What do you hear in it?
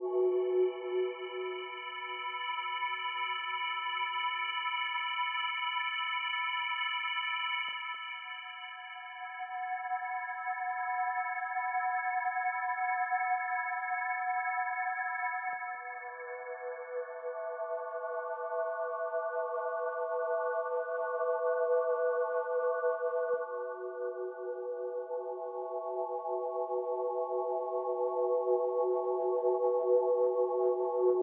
A cold pad. A complex chord transposed down 4 times.
123bpm